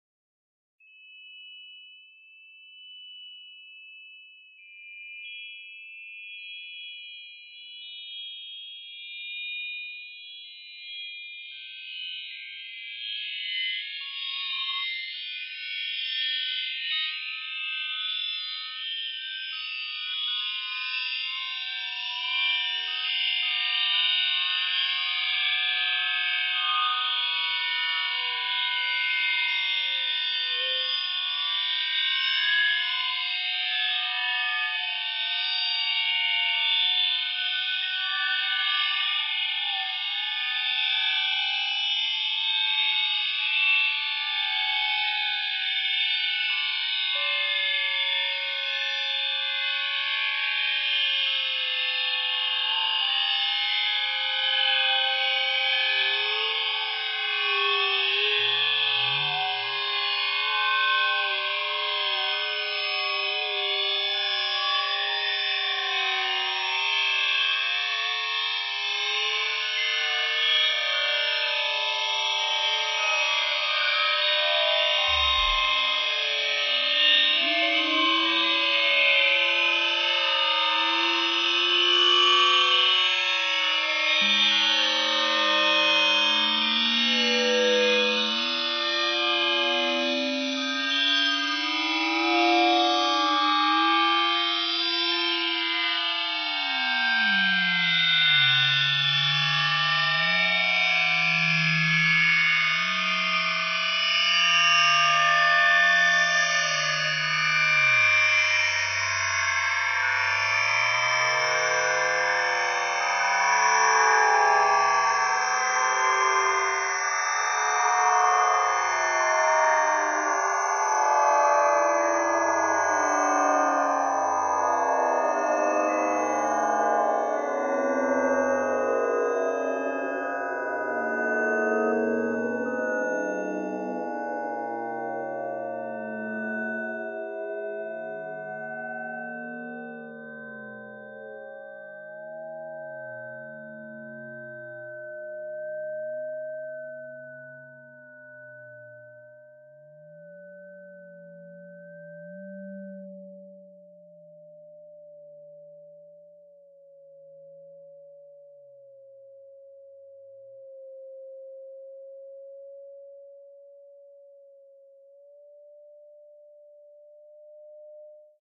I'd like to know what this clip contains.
scream conv plst spear1
high, chimes, processed, ligeti, pitched, fx